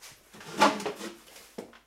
asseoir chaise3
sitting down on a wood chair which squeak
chair, furniture, sit-on-chair, sitting, sitting-down, squeaky, wood